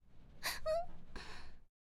Girl sigh of scare 02
Girl sigh of scare
Girl, scare